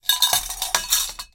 58-Golpe metal R
Sound of the fall of a metal object